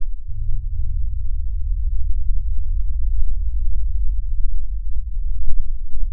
Low bassy rumble
A low bass rumble I made in Audacity